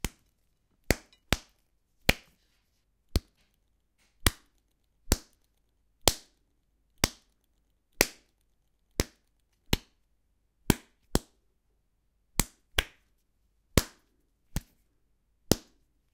Sounds like punching a body. Recorded with a Zoom H2.